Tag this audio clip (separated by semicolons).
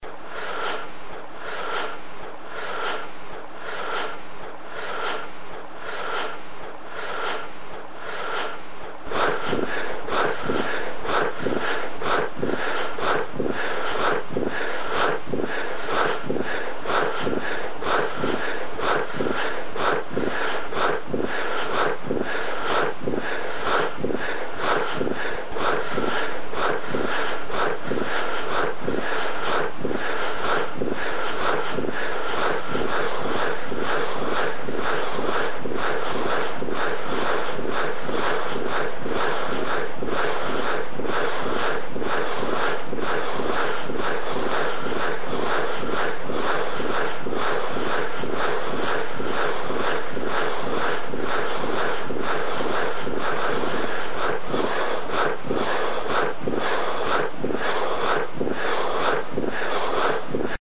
breath; runing-repiration; scaling-respiration